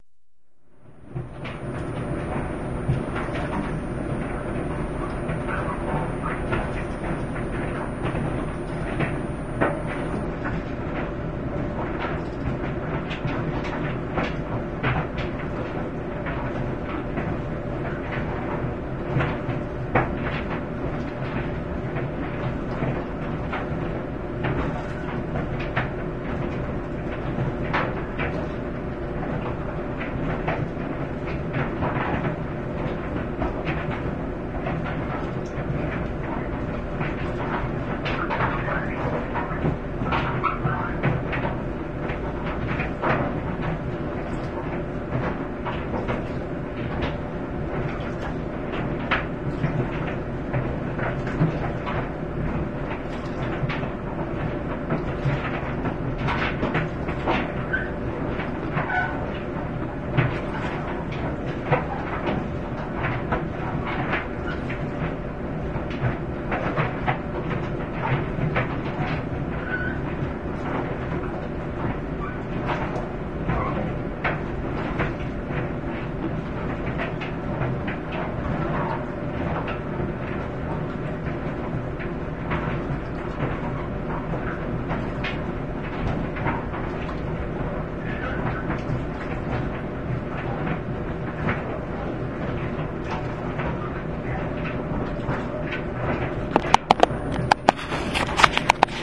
Sound of a washer and dryer running together. Recorded in a closed laundry room so there is a bit of reverb.